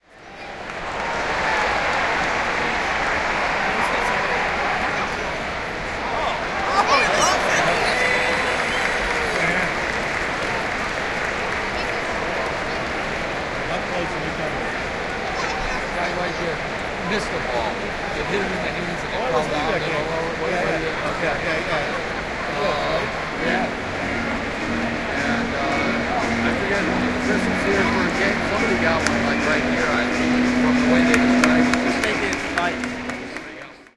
Cubs at WrigleyField
Baseball game in progress! What a view!
ambiance, baseball, chicago, field, game, wrigley